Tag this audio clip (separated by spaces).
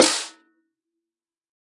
multisample snare velocity drum 1-shot